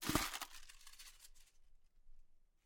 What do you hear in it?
A short sound of a piece of paper rustling in a bin
ball, paper, scrunched, wastepaper